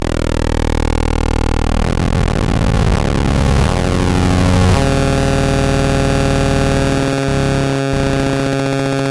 APC-HiToLowScape1
Atari-Punk-Console, Lo-Fi, APC